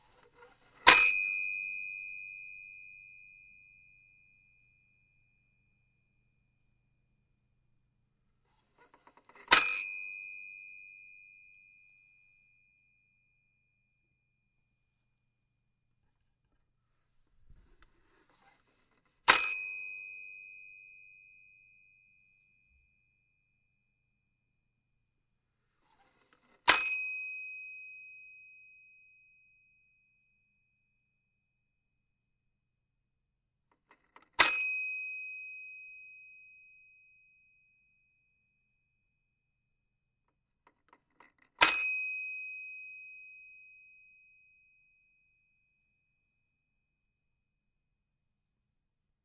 bell toy cash register ding
register, ding, cash, toy, bell